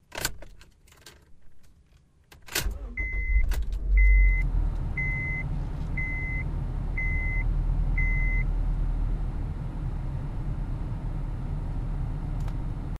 starting honda
Starting up a late model Honda Civic, from inside the passenger compartment. Includes air circulation blower and seatbelt warning beep. Some nice rumbly bass. Recorded on an H4, internal mics, normalized in Goldwave.